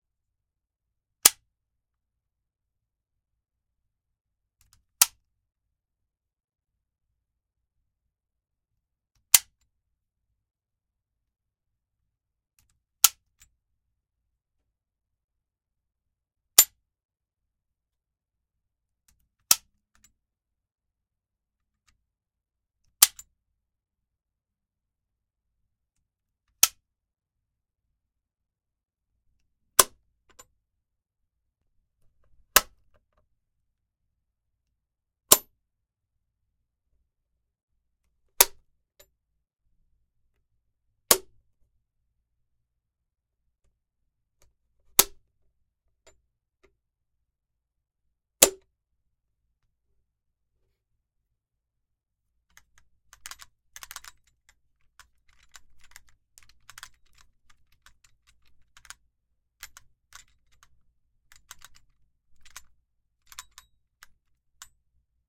Two-pole circuit breaker recorded held in my hand, also pressed against a wooden board for more resonance. And some rattling mechanical handling noises at the end.
Useful as electrical switch click.
TLM-103 mic straight into Pro Tools. The only processing is a mild gate. At least it has a good noise floor.